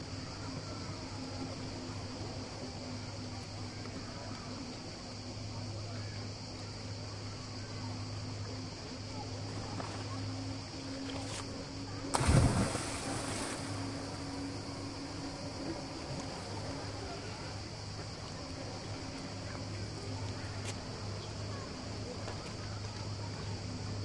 130723 Brela HarborJump R 4824
Surround recording of somebody jumping of a stone jetty into the basin of a small fishing harbor, from a height of about 3m. It is a warm summer evening, and some crickets can be heard above the waves gently lapping on the jetty. The jump and corresponding splash take place in the middle of the recording, the recorder is situated on the jetty next to the jump-spot, facing the harbor basin.
Recorded with a Zoom H2.
This file contains the rear channels, recorded with a mic-dispersion of 120°
atmo, close-range, crickets, field-recording, lapping, loud, maritime, sea, shore, splash, water, waves